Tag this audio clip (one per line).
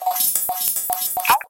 alien
funk
radio
sounddesign